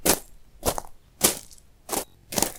Gravel Crunches
Pressing foot into loose gravel